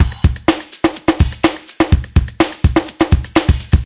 Funk kit01 125bpm
2 bar, 125 bpm drum loop
drumloop, funk, drums, breakbeat, hip-hop